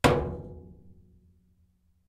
Scrap Tom (hard)
Tom made of metal scraps.
drum, junk, metallic, scrap, tom, toms